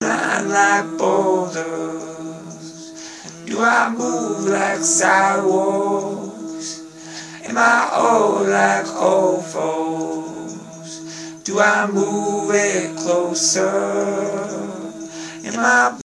OLD FOLK Vocals
A collection of samples/loops intended for personal and commercial music production. All compositions where written and performed by Chris S. Bacon on Home Sick Recordings. Take things, shake things, make things.
acapella, acoustic-guitar, bass, beat, drum-beat, drums, Folk, free, guitar, harmony, indie, Indie-folk, loop, looping, loops, melody, original-music, percussion, piano, rock, samples, sounds, synth, vocal-loops, voice, whistle